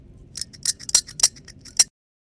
Metal Scrape
A metal scraping sound.
metal, scrape